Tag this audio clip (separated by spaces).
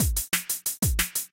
drum-and-bass break drum-loop loop dnb jungle breakbeat drums drum